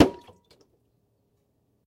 7 Table with Glass and Water Jug Snare
This Is my first Sample pack, I hope it's helpful for you! Many snares, and a few Kicks are in this pack, and also a transitional sound.
rim, snare